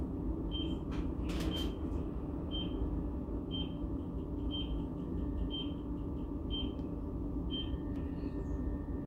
Pip-Sound
This sound could be from the train elevator or bomb